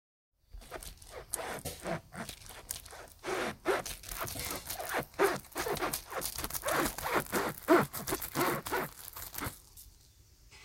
Squeaky, squishy sound
I really have no idea what this sound could be used for, it's really random! XD
Made by half a watermelon being slid jerkily across a board. The title basically says it all, it's squeaking merged with a squishy sound. Possibly sounds like something covered in slime moving.
wet, alien, squeak, squishy, slime, squidge, squish, slide